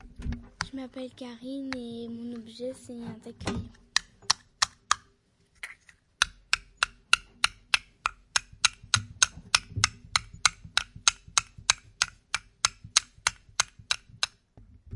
Carine-taille crayon
mysound, saint-guinoux, France